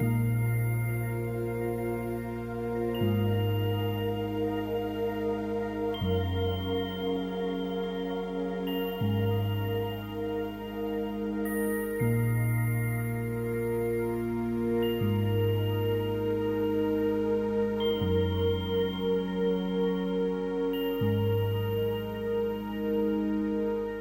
Little boc melody - soft synths in ableton , spliced and reverb.